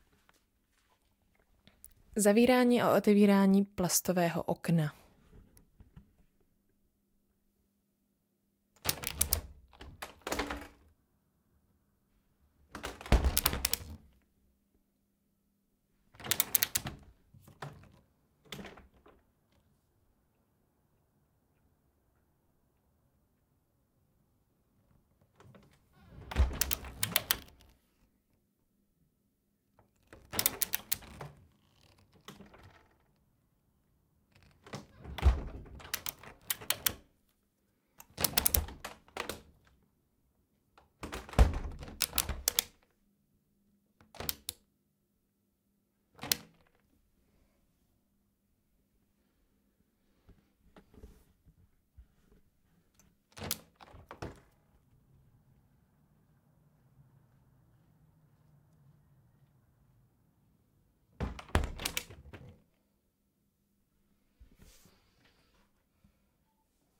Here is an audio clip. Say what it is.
plastic window open/close
Window of my apartment being closed/open.
Recorder with Sennheiser MKH 8060 and Zoom F4.
Mono
close, open, window